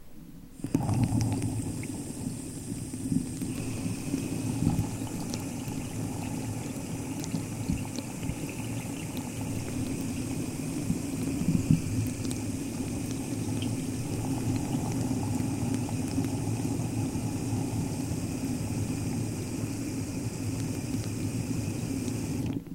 Inside a plastic cup upside down under the faucet recorded with laptop and USB microphone in the bathroom.
bathroom, foley, under